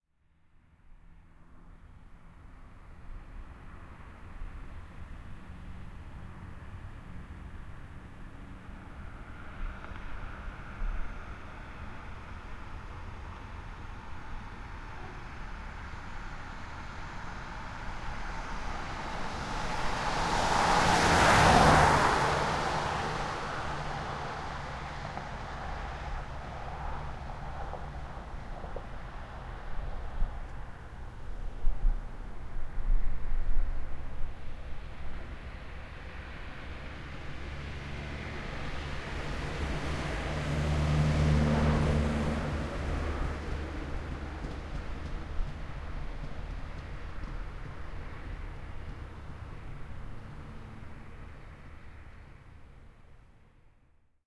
road and cars
one car pass the road at the night
recording in Turkey/Ankara/Umitköy 2008 /
baran gulesen